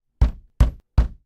Hitting a wooden door.